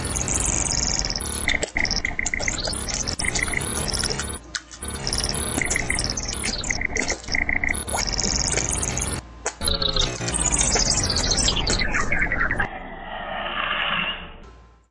Stereo glitch effect created using Audacity.
effect, dub, glitch, sound, abstract